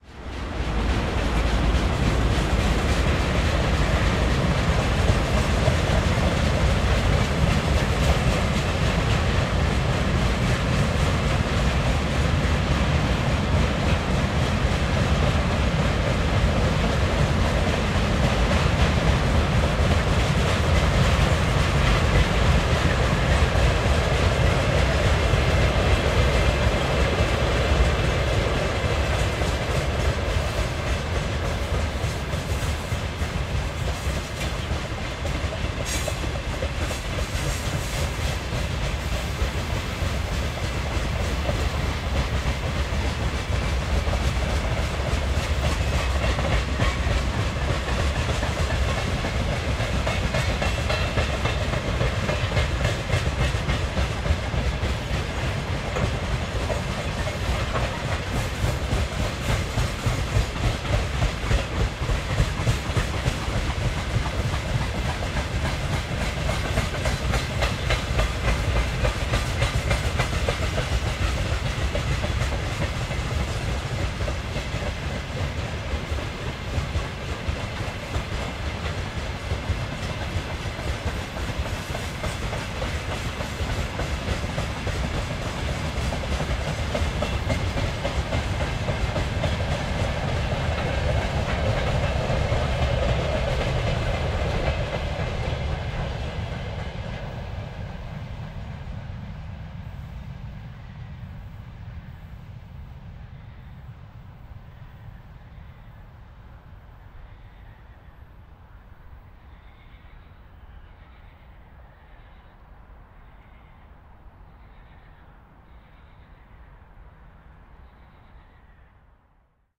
XY Freight train med speed
Heavy freight train goes from left to right.
Recorded on ZOOM H6 (XY mic).
train, railway